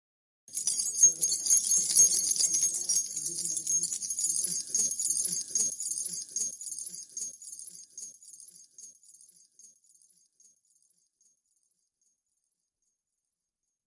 MODRZYK Léna 2017 2018 KeyBell
This sound is completely analog. I recorded the sound of my key ring and tried to extract just the sound of the key. In this purpose, I used the effect “noise reduction”. I tried to avoid distortion but after several attempts we still hear the sound of the voices in the background.
Descriptif selon la typologie de Schaeffer :
Code : V''
Précisions morphologie :
- Masse : Son cannelés (nœuds + toniques = mélange de note et de bruit)
- Timbre harmonique : acide éclatant
- Grain : microstructure
- Allure : chevrotement
- Dynamique : l’attaque est douce et graduelle
- Profil mélodique : serpentine
- Profil de masse : Site : hauteur parmi d’autres
bell, crystal, key, key-ring